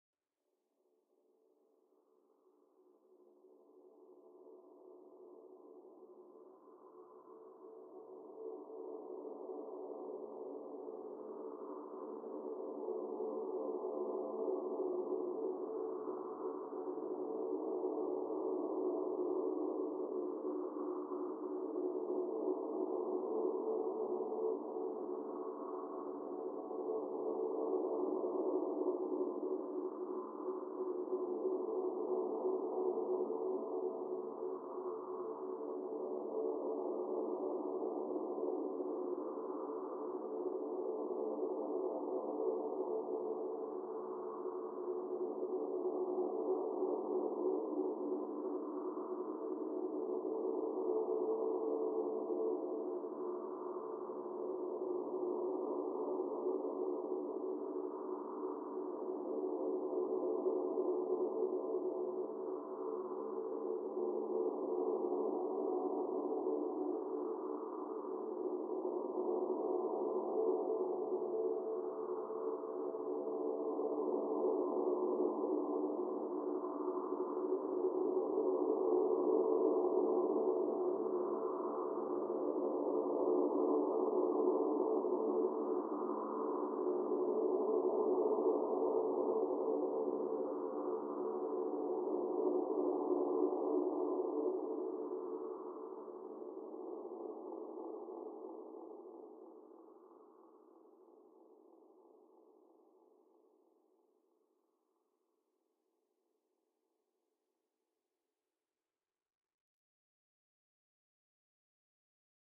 Tense background ambiance with a quiet sound like breathing